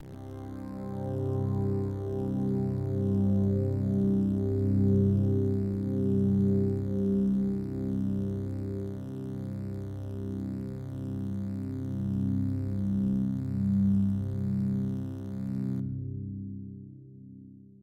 Created by layering strings, effects or samples. Attempted to use only C notes when layering. A buzzy pad with strings and bass. Cleaner string pad, with a quiet buzzy sound low in the mix.
Pad, Strings